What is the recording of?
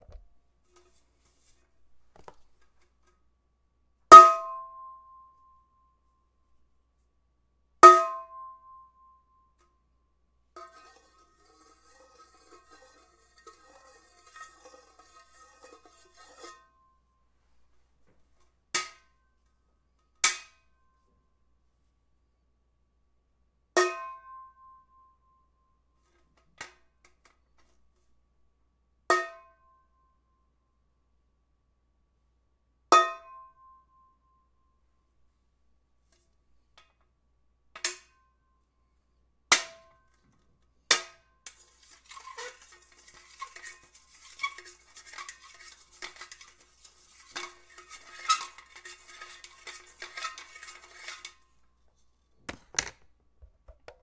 Several bongs I made using a jar and a spoon